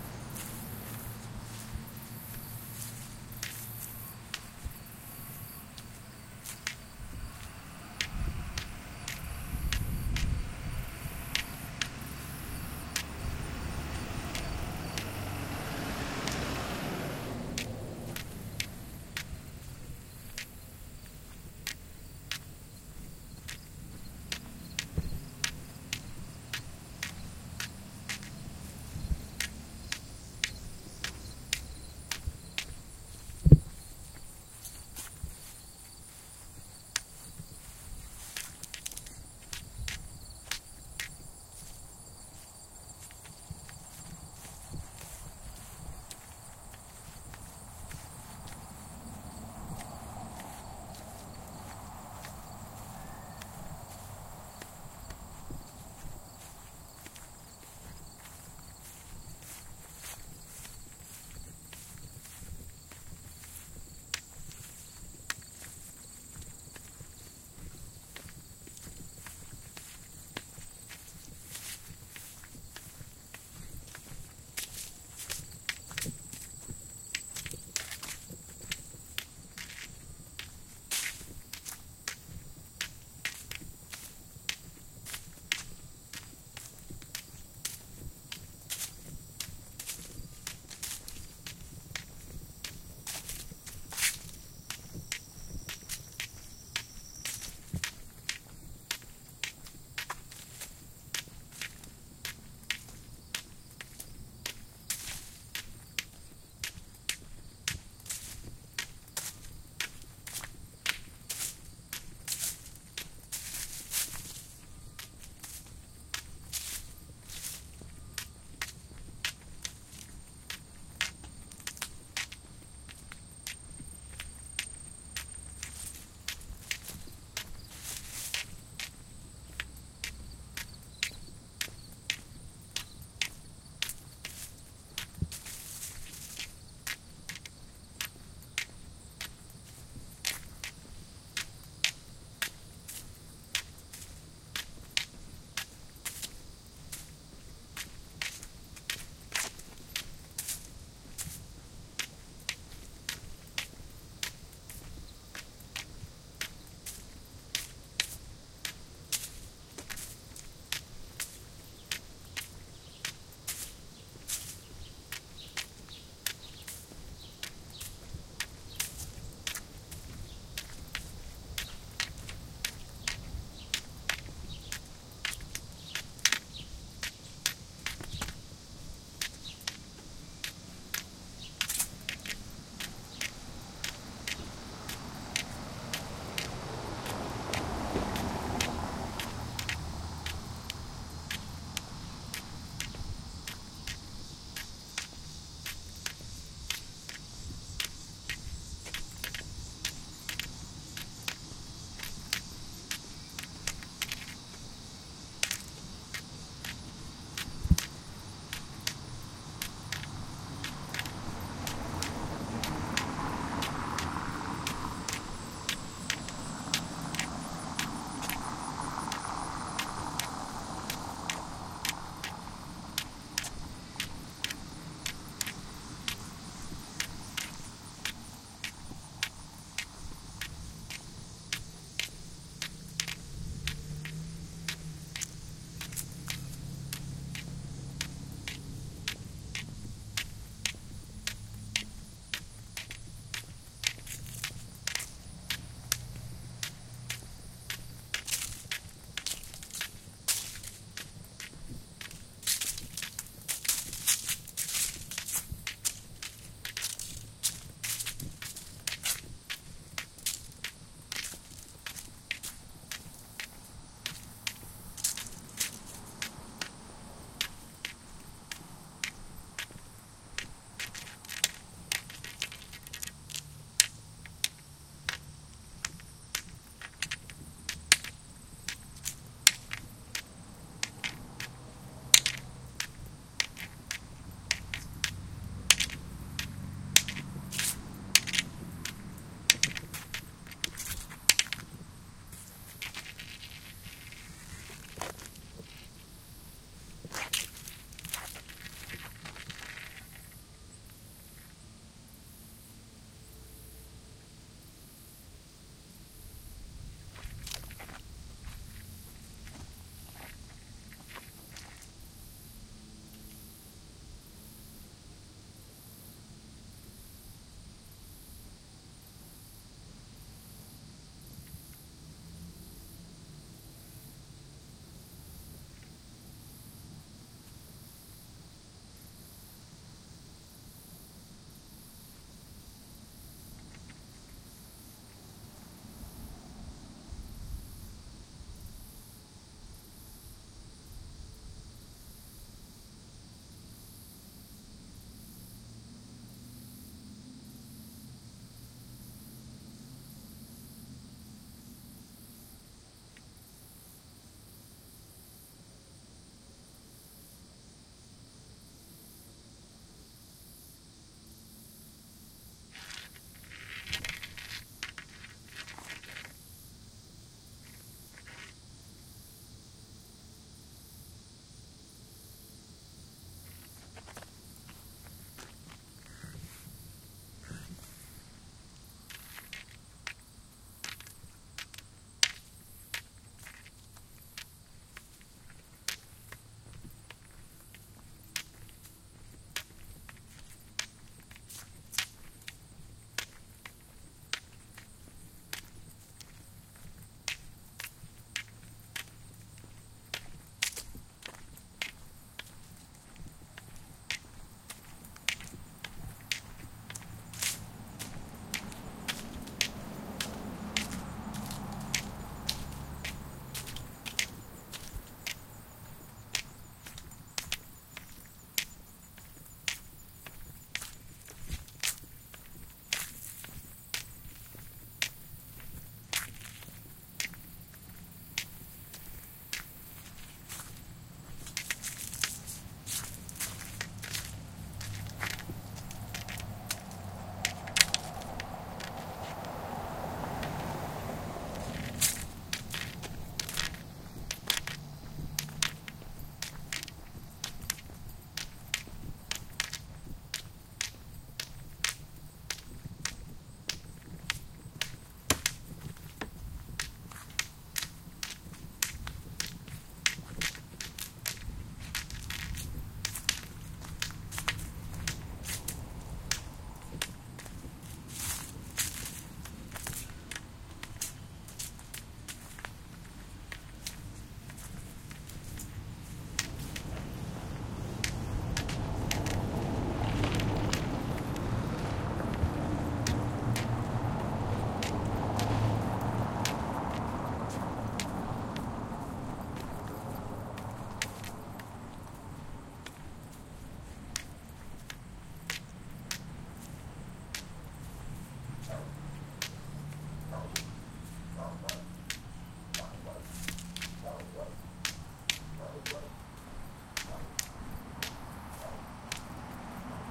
Part of my late morning walk on August 31 with my long fiberglass NFB white cane, 3 block lengths of walking around the block. It starts on a broken sidewalk mostly covered by grass, so my cane is quiet. Then I hit normal sidewalk a bit before I turn on the first of 2 brick streets. At about 03:00 you start to hear some traffic on the busier of the 2 brick streets. They're not yellow brick roads, but Atchison Kansas is known for them. Birds, crickets, daytime cicadas and my cane hitting different things like sidewalk, leaves near the edge of the sidewalk, a big square trash can, and at 04:39, the top of a low brick wall which at 04:52 I sit down on for a minute. Some sort of heavy machinery can be heard in the far distance. I get back up and continue walking. I clip the recording just before I hit a rough spot that rattles my mic. Just before that a dog is barking in the distance.
Atchison, atmosphere, barking, birds, blind, breathing, brick-road, brick-street, brick-wall, cicadas, city, crickets, dog, fiberglass, field-recording, footsteps, Kansas, leaves, light-wind, neighborhood, shoes, small-town, soundscape, summer, tennis-shoes, traffic, visually-impaired, walk, walking, white-cane